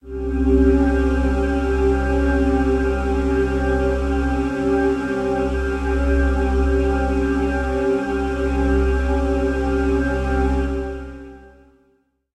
A suspense-moment sound. Recorded with Focusrite Scarlett 2i2 and Sony Sound Forge 10 using Kurzweil SP4-7.
horror, shock, shocked, suspense, tension, terror